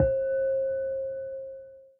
Sound of a singing bowl being hit lightly.
ping, singing, sing, bowl, ding